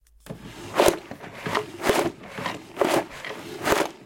A cluttered drawer is being opened and closed very fast. Recorded with a Sony IC recorder.